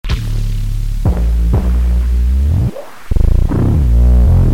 res out 12
In the pack increasing sequence number corresponds to increasing overall feedback gain.
automaton, chaos, computer-generated, feedback-system, neural-oscillator, synth